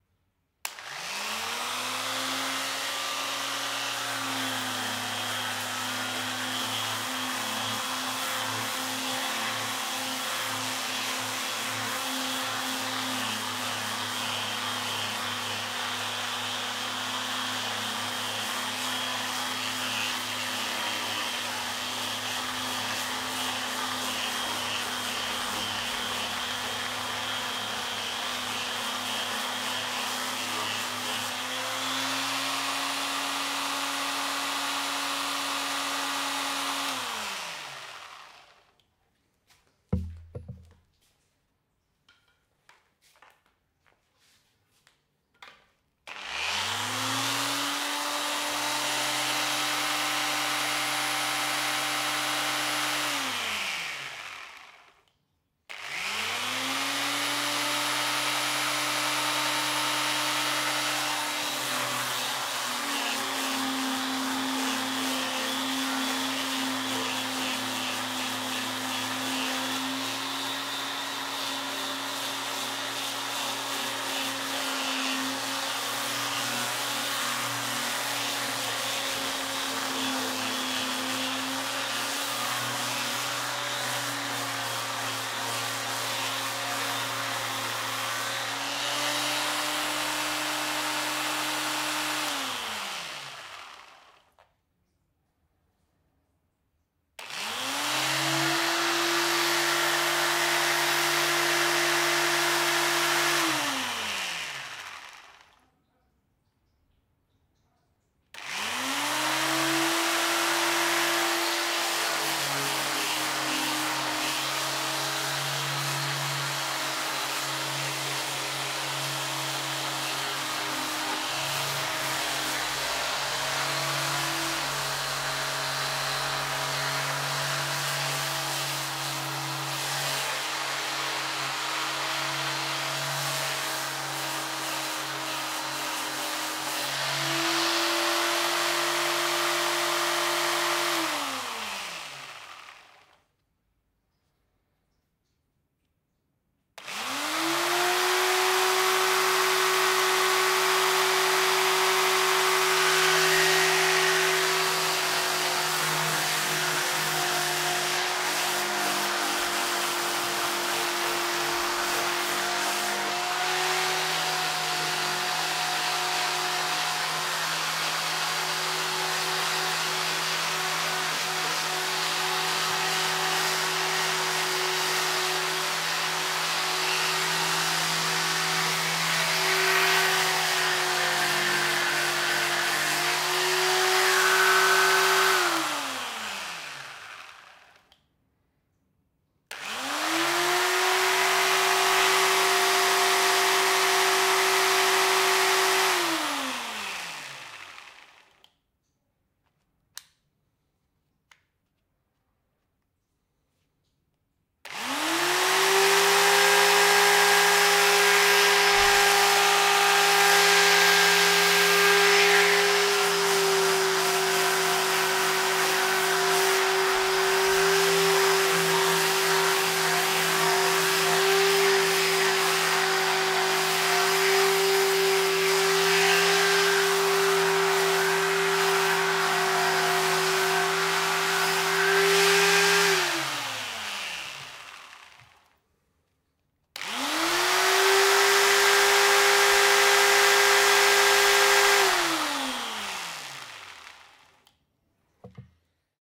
ElectricSander ShortAction StartStop AllSpeeds 4824 01
Electric Sander start/stop and sanding at different speeds.
sound-effect, sander, machinery, field-recording